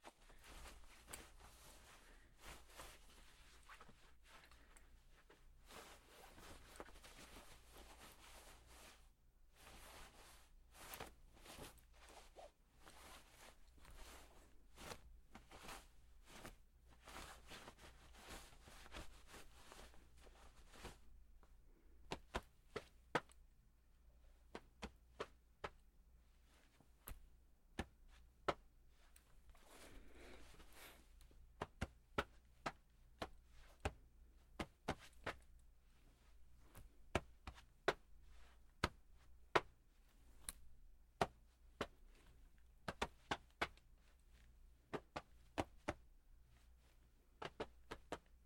searching through pockets and patting self down
A person searching through their pockets as well as doing that patting-yourself-down thing you do to check if a pocket is empty.